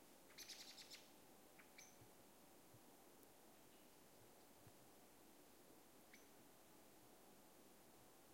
I recorded an atmosphere of birds in the forest.